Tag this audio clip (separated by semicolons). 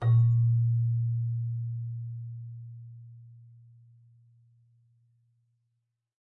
bell; celesta; chimes; keyboard